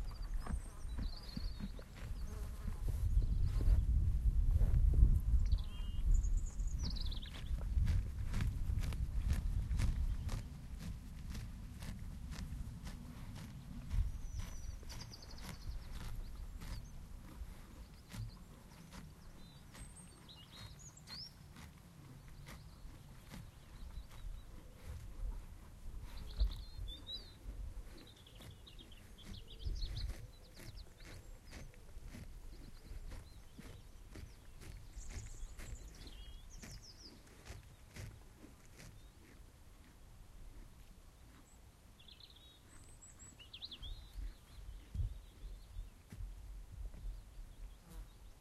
Ponies eating grass. very close to recorder which is on the ground, so there is some thumping soundas the pony eats or moves. Also clear bird song, and in the background a pigeon,some buzzing insects,and some wind interference.
These are called wild ponies but are really just free roaming on the moor. They belong to farmers.